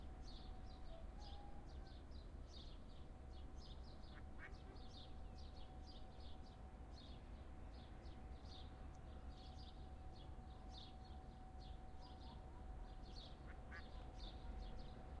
porto morning riverside birds 03 2
Porto, Portugal, Douro river, 19th July 2009, 06:00: Morning mood before sunrise at the riverside with birds singing. At two points a duck quacks. No traffic, just a distant cleaning car on the other side of the river.
Recorded with a Zoom-H4 and a Rode NT4 mic.